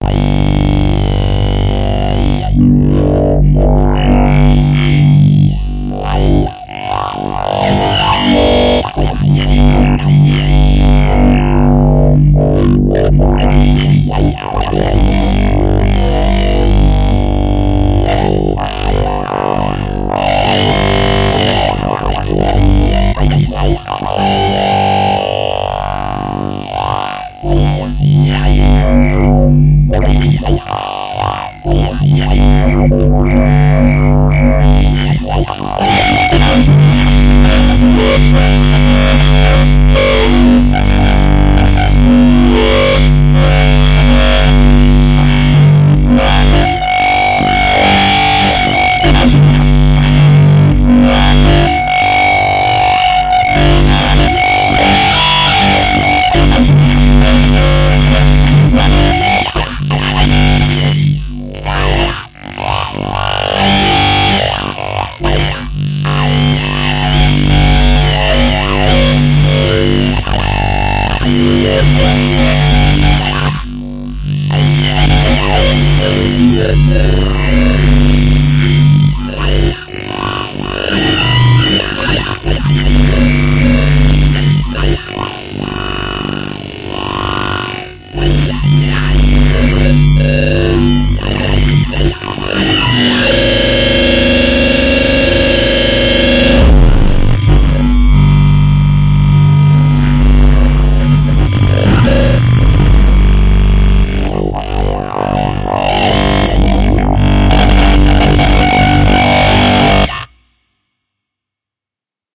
A bass to squelch thine face wyth.
big, electronic, formant, massive, talk, crispy, evolving, sub, wobble, morphing, talking, weird, bass, vowel, dub, dubstep, distorted, full-on, harsh, voice, gnarly, effect, robotic, huge, low, crunchy, wobbles